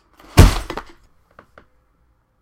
A big box of stuff falling to the ground.